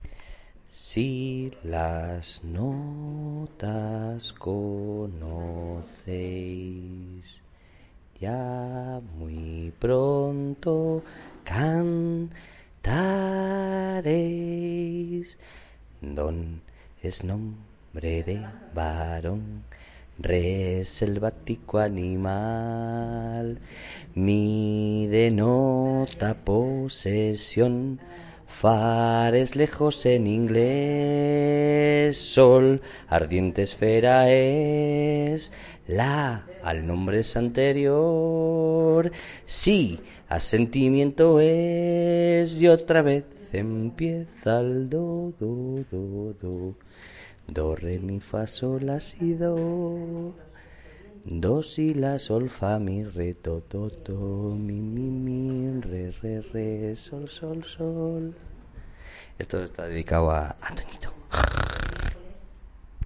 MAN SING 01
A mans voice singing DO, RE, MI song in spanish
man, spanish, song, sing